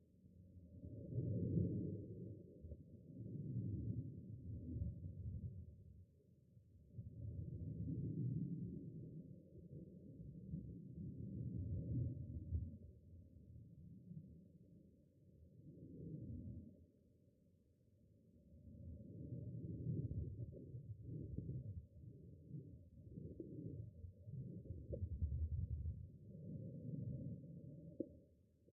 This is a recording of a cello bow being rosined with all of the high frequency information being taken out.
cello, drowning, underwater, rosin, bow